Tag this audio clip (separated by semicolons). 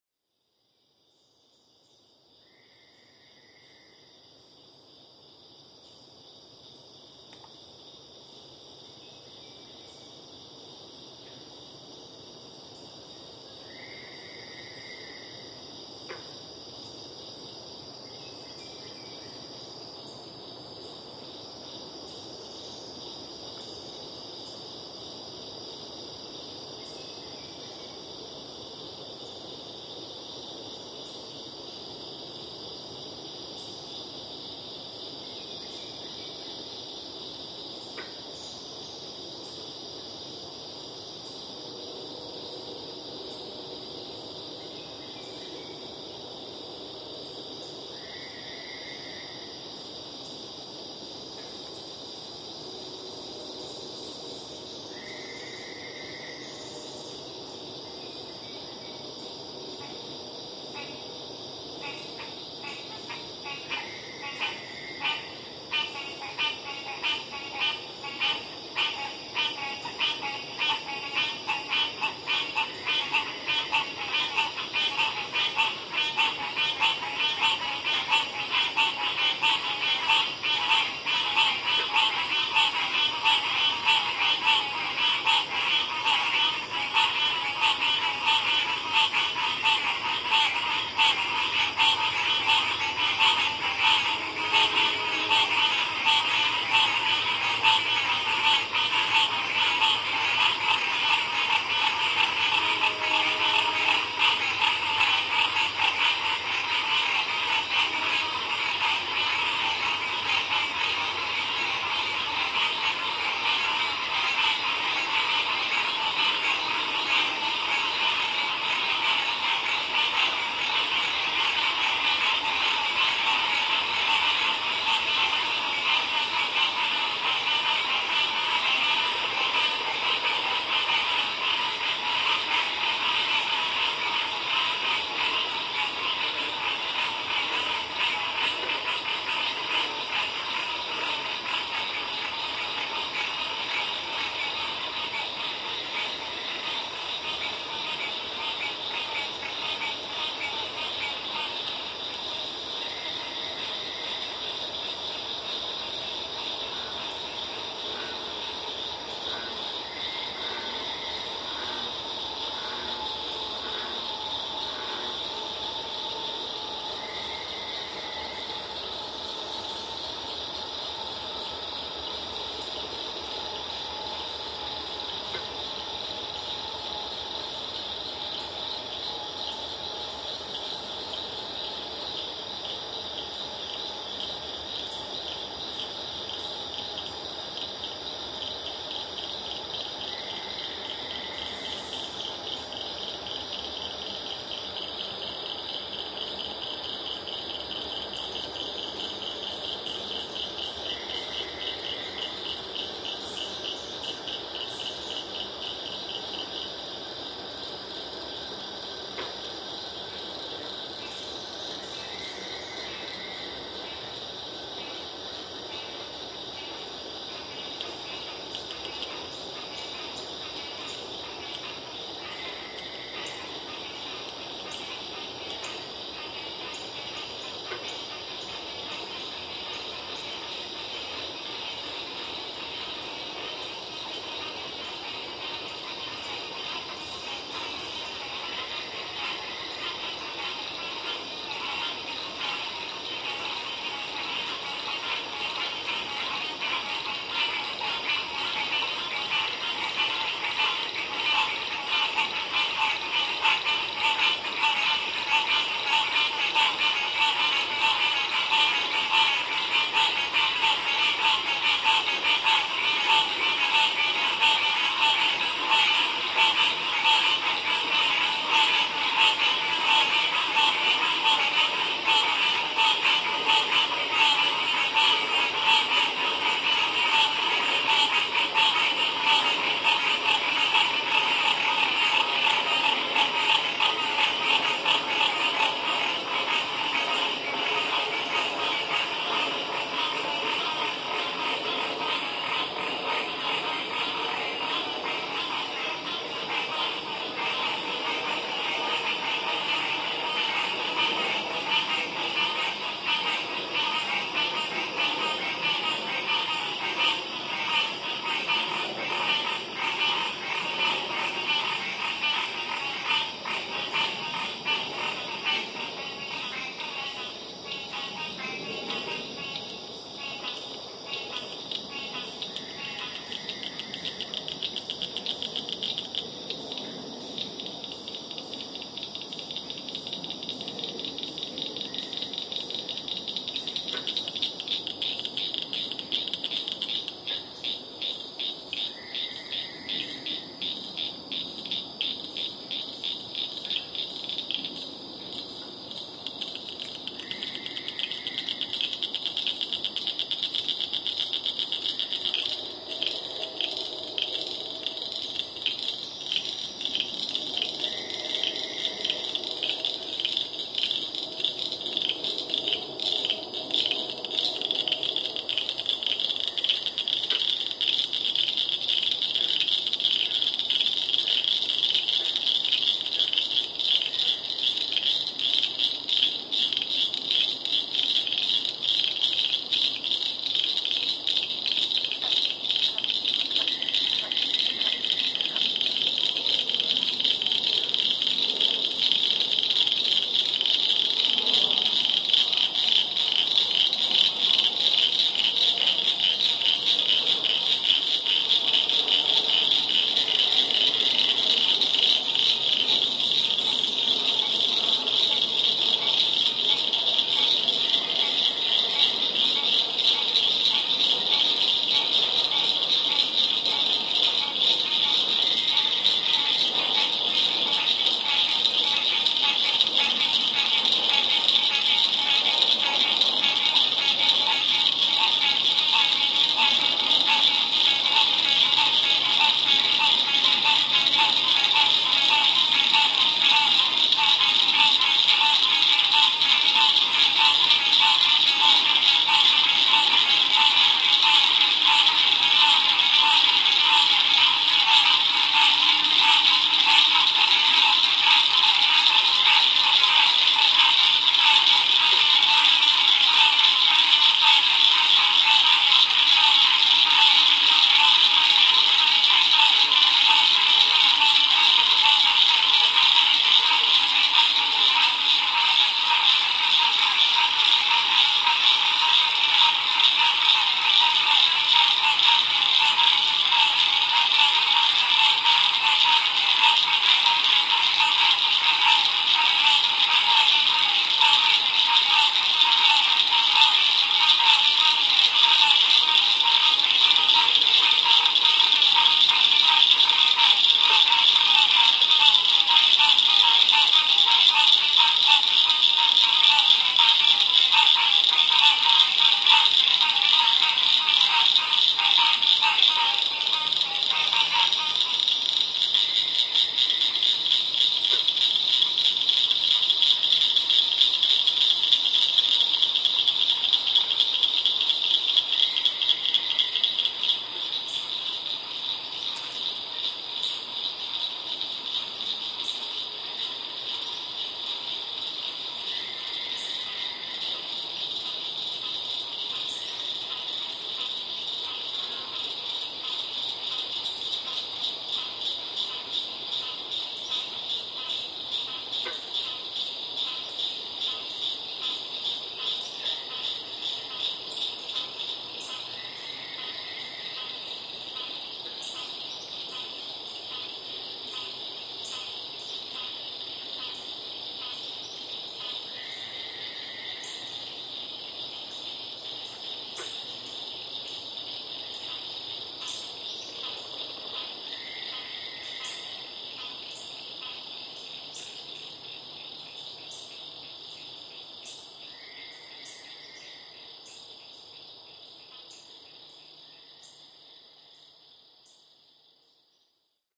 swamp; forest; frogs; night; wetlands; field-recording; nature; woods; virginia; richmond; toads; pond; pony-pasture; marsh; evening; birds; park